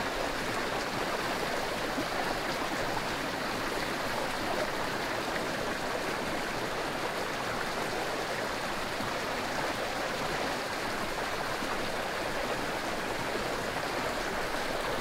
Short clip of flowing water recorded outdoor.
stream, flowing, field-recording